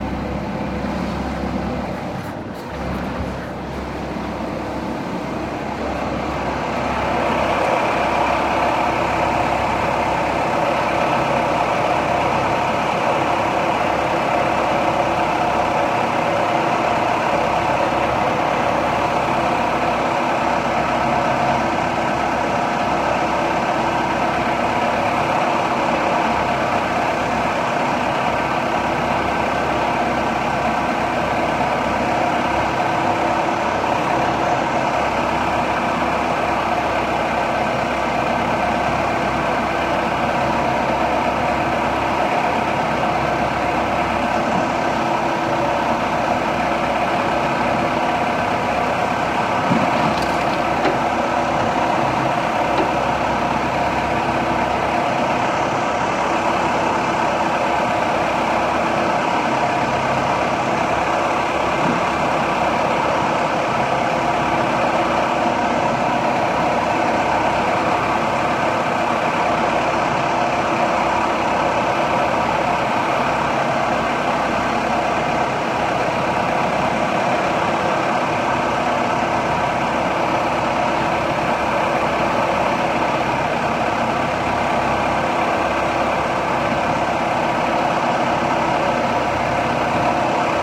Diesel boat engine running with ocean sounds in background. Recorded with ZOOM h6n recording device.
boat, boat-engine, diesel, diesel-engine, engine, engine-noise, engine-running, field-recording, noise, vessel